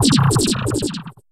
Shooting Sounds 038
futuristic, gameaudio, gun, laser, shoot, shooting, weapon